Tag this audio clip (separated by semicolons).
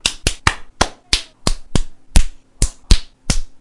Fist; Fisting; Hit